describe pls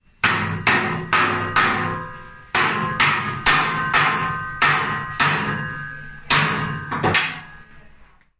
Sound from a blacksmith hitting metals with hammer.